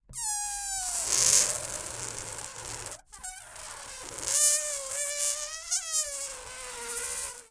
Balloon - Deflate 07
Fun with balloons :)
Recorded with a Beyerdynamic MC740 and a Zoom H6.